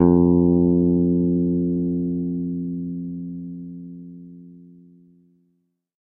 Second octave note.
bass, guitar, multisample